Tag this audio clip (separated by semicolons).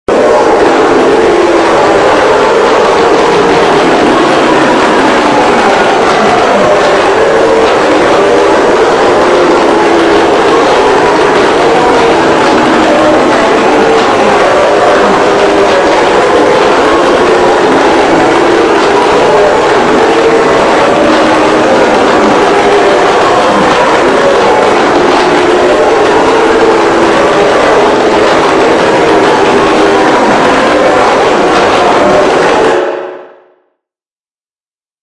bizarre; creepy; loud; strange; wtf